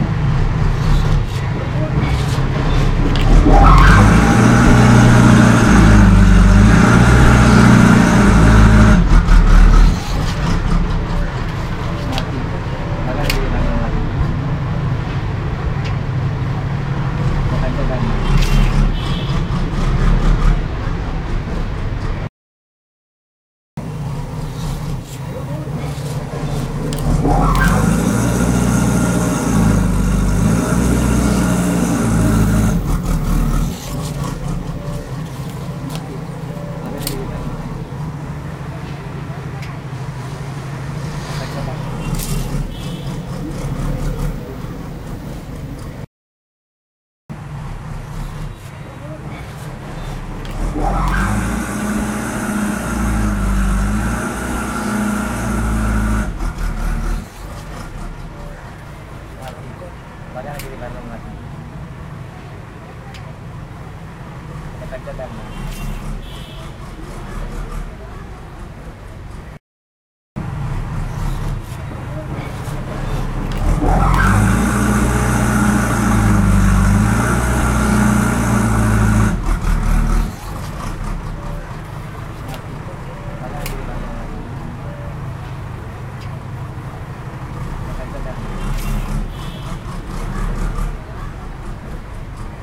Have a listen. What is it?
truck or ATV real start int garage rev and shut off roomy 4 different mics bgsound India
real, truck, rev, India, start, garage, shut, ATV, roomy, off, int, or